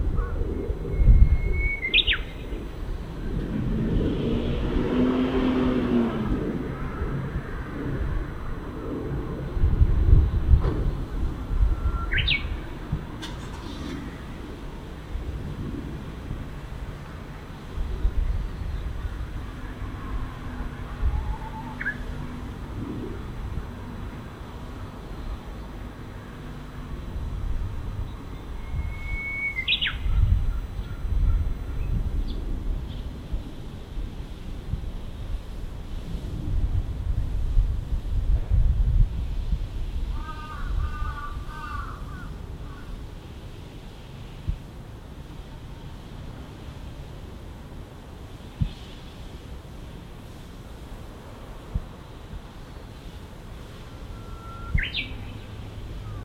Japanese bush warbler (uguisu) in a city ambiance
I have recorded "uguisu", the Japanese bush warbler near my house in Yokohama. You can also hear a little of wind, cars and other city sounds.
birdsong, japan, bush-warbler, city, ambiance, bird, birds, yokohama, field-recording, ambient